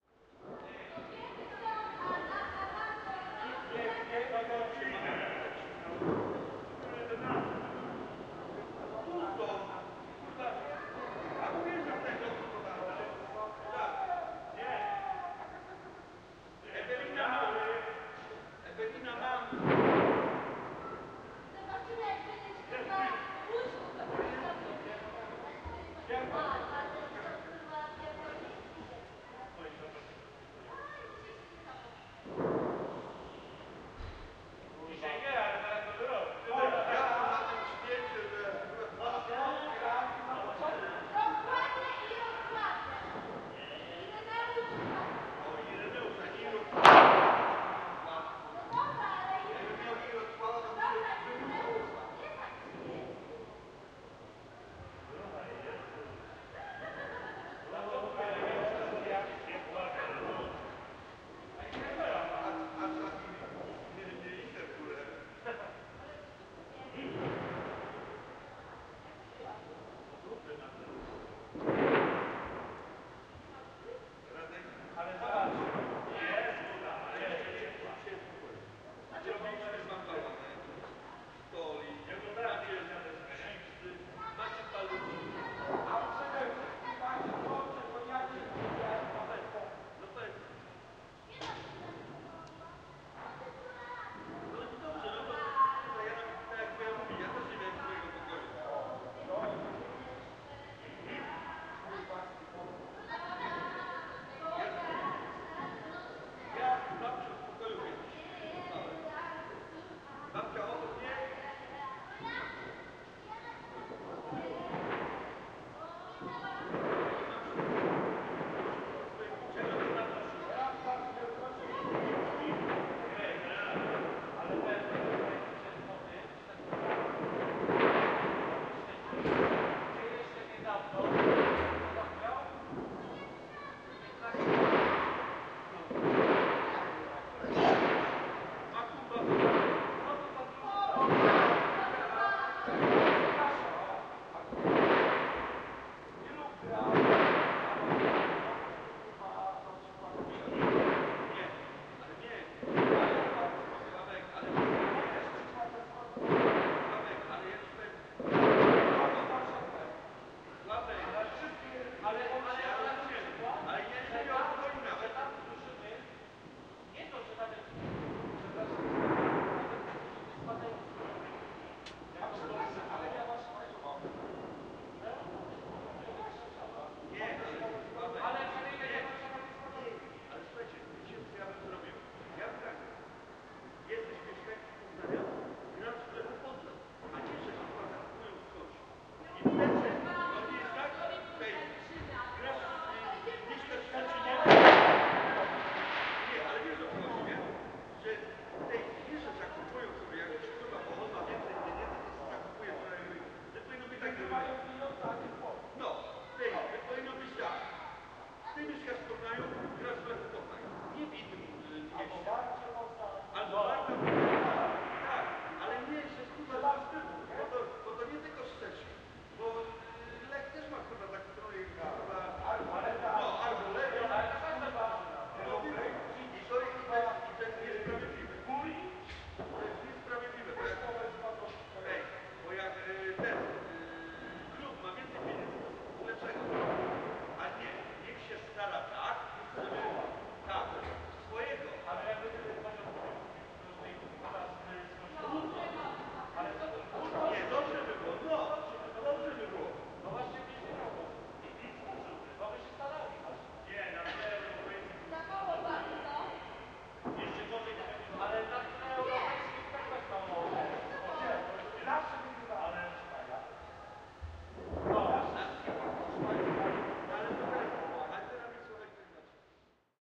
courtyard new years eve 311213
31.12.2013: about 22.30. Voices of my neigbours and sound of fireworks. Courtyard of the old tenement in Wilda district (Poznan, Poland). Gorna Wilda street.
courtyard, field, field-recording, fireworks, poland, voices, wilda